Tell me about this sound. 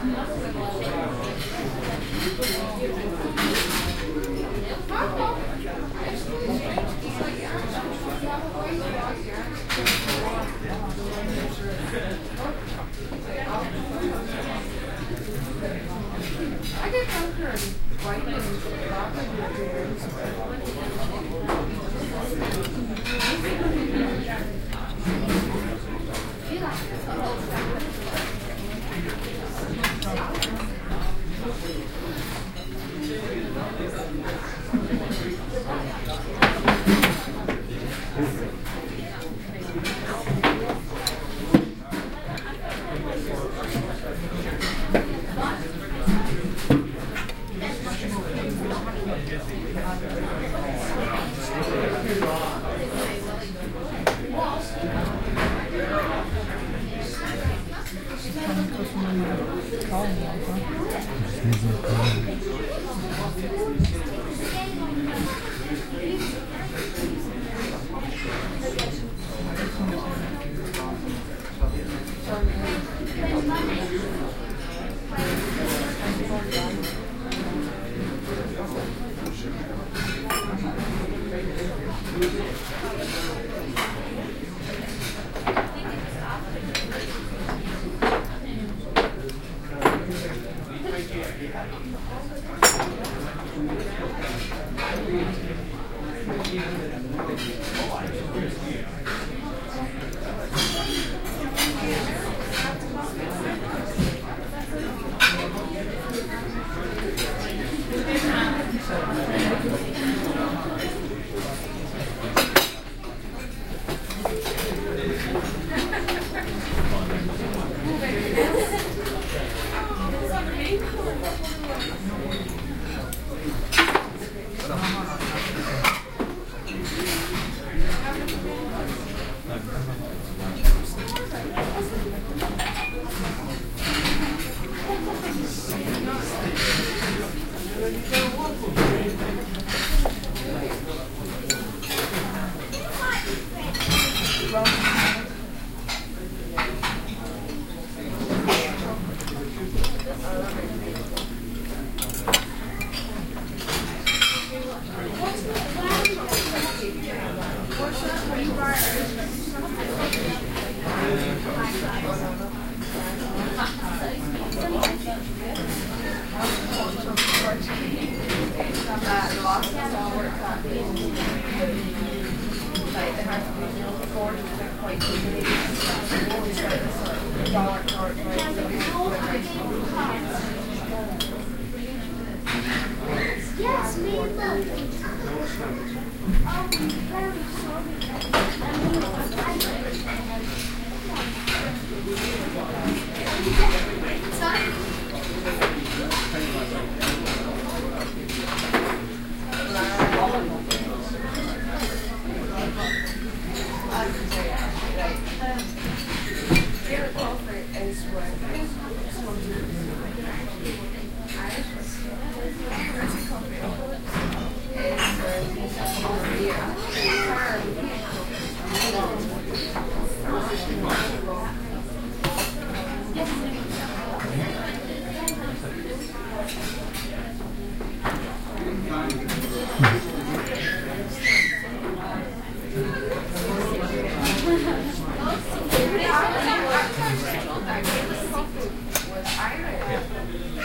Scottish Restaurant
In August 1994 we went to The Byre in Kenmore / Scotland for a meal.
This recording was done just before, using the portable DAT recorder
from Sony TCD-D7 and the Soundman OKM II. The best "restaurant" sound I ever recorded. Sadly the restaurant went for a new development.
More scottish sounds can be found on:
scotland, restaurant, binaural, crowd, field-recording